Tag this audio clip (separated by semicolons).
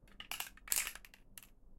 spraycan; rattle; foley; paint; plastic; art; shake; spraypaint; metal; tag; street-art; graffiti; can; spray; aerosol; tagging